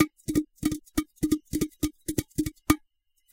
can-loop8
rhythmic loop with my fingers on a empty beer can.